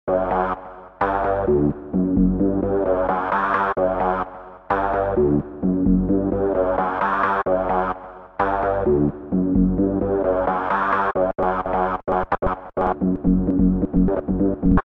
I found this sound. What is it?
Some dance type euro pop sound recorded by me at 130bpm.
dance, synth